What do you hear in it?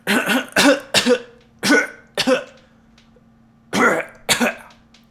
I am a non-smoker and usually never cough. I hate people who are always coughing. I could go days on end without needing to cough. My lungs are in great shape. I am faking it here.